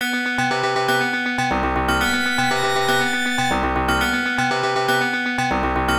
Horror Chase (Loop)
Something is behind me and it's running towards me. Short 8-bit music loop made in Bosca Ceoil.
0, 16-bit, 8, 8-bit, amazing, arcade, audio, best, bit, bits, chase, commercial, common, domain, free, game, good, horror, indie, loop, loops, music, old, pixel, public, retro, royalty, sense, use